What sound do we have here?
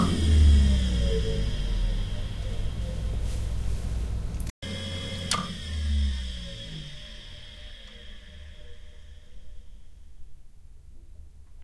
K2000r, switch-off, power-down. stereo
Recording of K200r synthesizer using AKG 414 mics. Shutdown twice fan slowdown and hard-disk shutdown
hard-drive, power-down, computer, electrical, field-recording, disk, loading, fan